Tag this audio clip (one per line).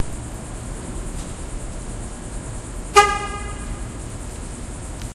field-recording
electet
microphone
car
beep
horn
test
digital